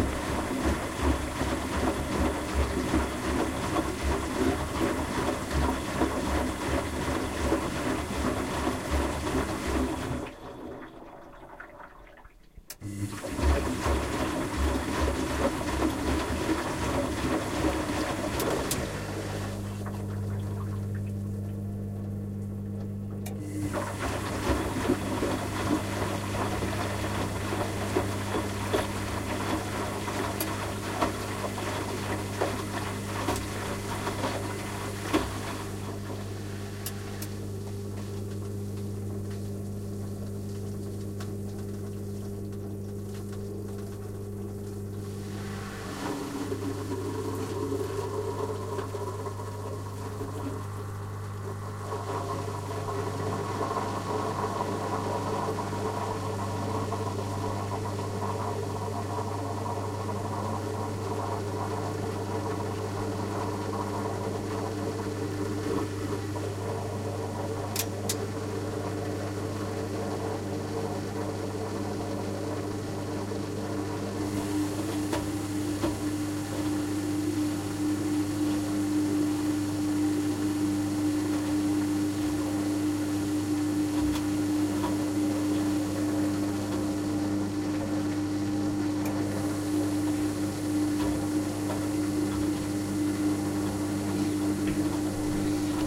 Clip of a washing machine doing its work, recorded with zoom h4n